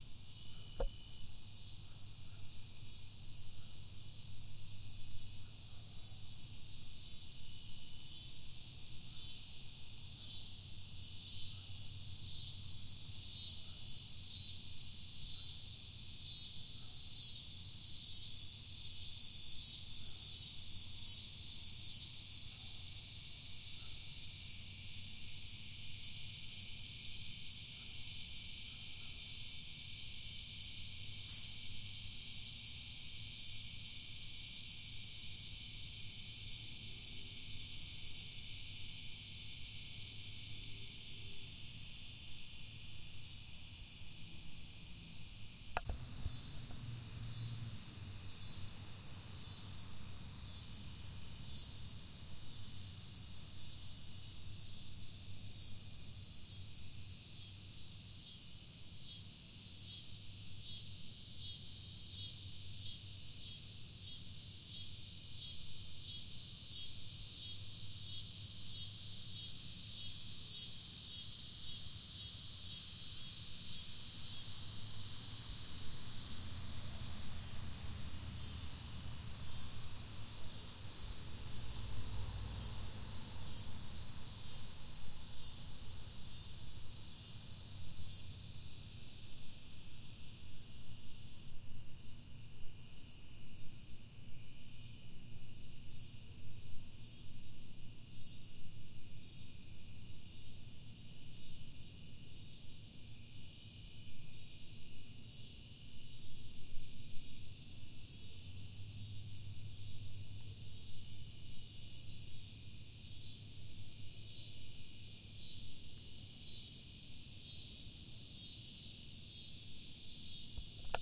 I stood outside with my tascam recorder late one night to get some of the ambience sounds. This is during the summer, so plenty of insects.